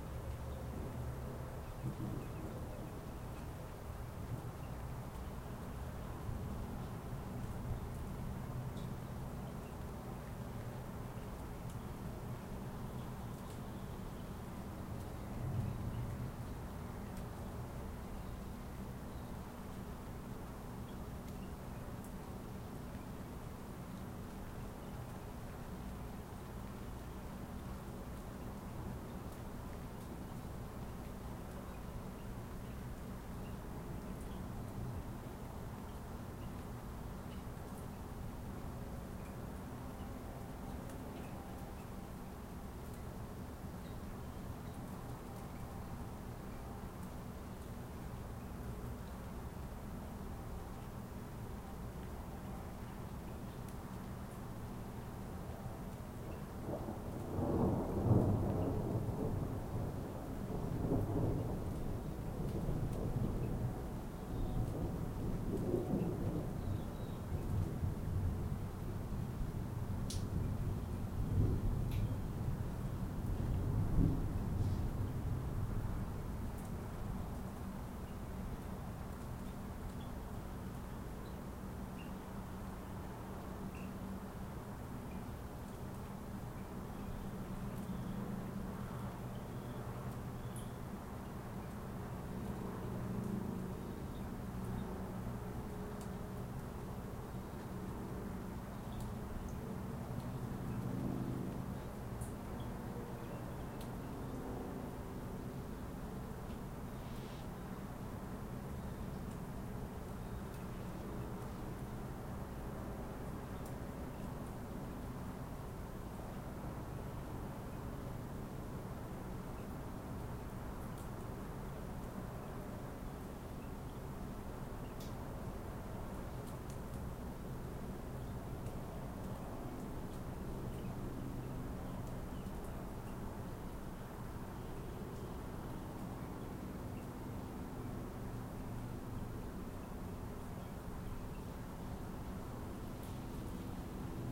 Thunder storm recorded with USB mic direct to laptop, some have rain some don't.
storm, field-recording, thunder